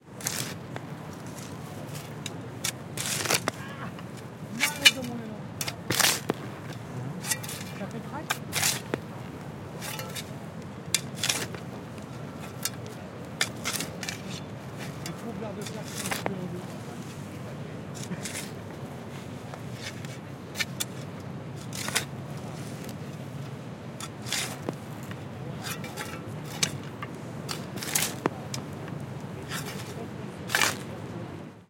Pelle-creusant terre(st)
Someone digging a hole recorded on DAT (Tascam DAP-1) with a Rode NT4 by G de Courtivron.
bulding, dig, site